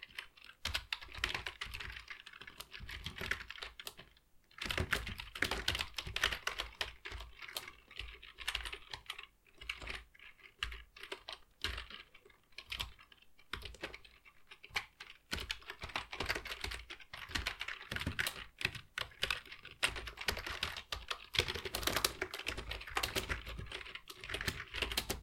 Sound of typing on keyboard.